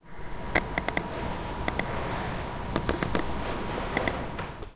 The sound was recorded inside the upf poblenou library. We can dustinguish very well both the clicks of the mouse and the computer's hum. The recording was made with an Edirol R-09 HR portable recorder, which was placed at 10cm from the mouse.